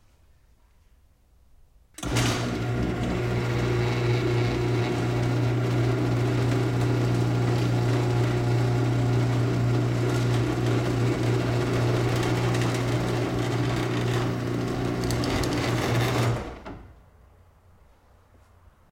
Opening my automatic garage door from the inside of garage, minus the sound of clicking the "open" button on remote.